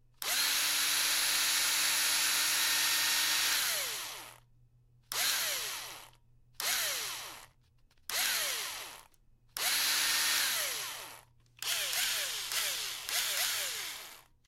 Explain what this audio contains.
Triggering a low-power consumer electric drill.
drill, vrrrrr, screwdriver, whirr, electric, motor